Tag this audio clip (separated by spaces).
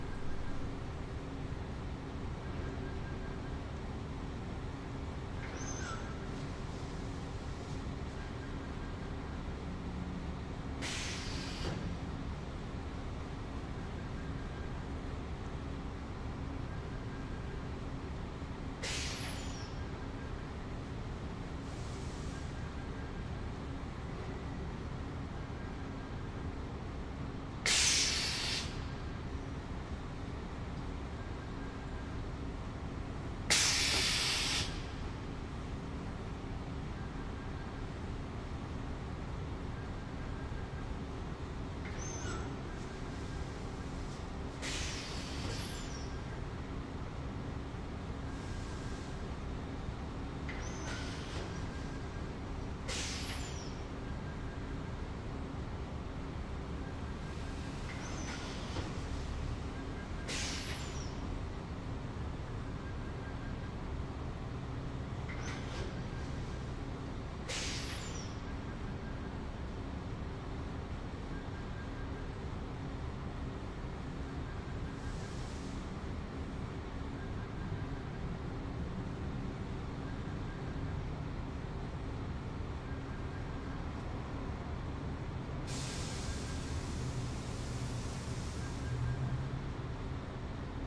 factory presses release steam